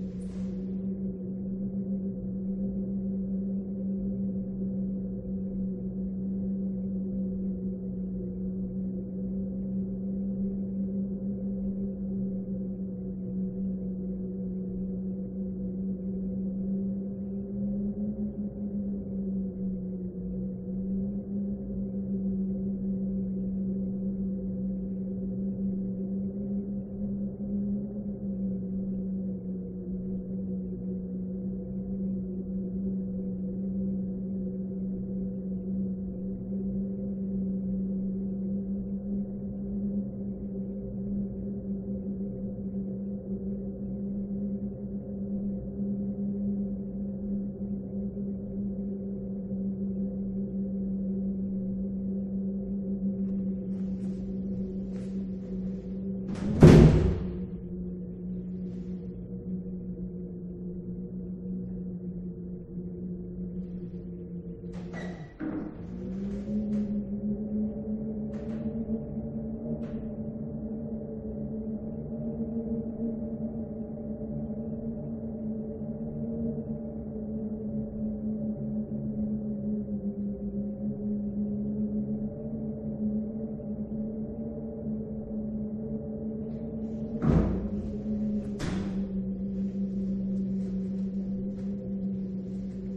Wind and Door
Some door frames apparently are intended as sound machines. This recording contains both the howling as well as doors opening and closing.
Recorded with a Zoom H2. Edited with Audacity.
Plaintext:
HTML: